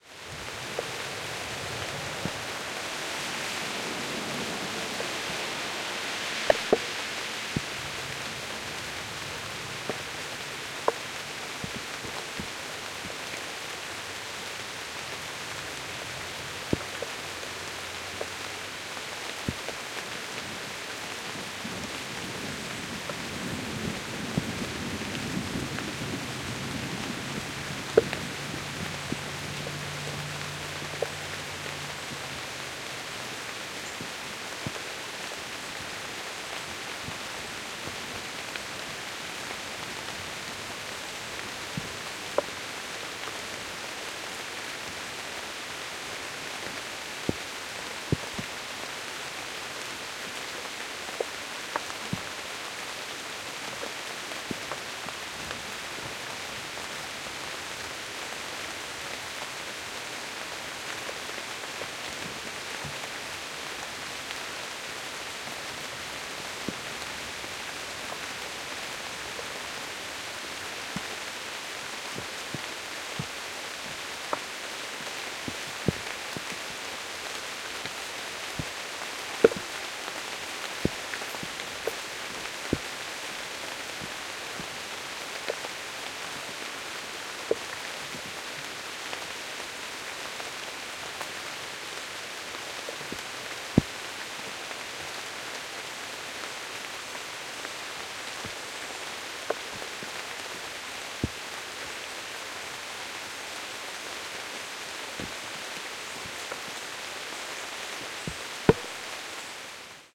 A peaceful, and much-needed July rain in the Midwest. Marantz PMD661 and two Sennheiser ME66s were set up in deep woods recording this around 5:00 in the afternoon. Some distant thunder, and a singing bird highlight this slice of summer life in the Illinois forest. Complete with individual drops hitting the windscreen on the microphones. Recorded on Jluy 5th, 2016.